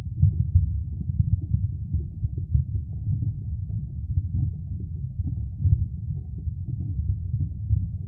The sound of something frying, edited to make a sound of
someone travling underwater.
This sound, like everything I upload here,
underwater, submerged, deepsea, water, liquid, bubbling, free, edited, hydro, bubbles, deep, bubble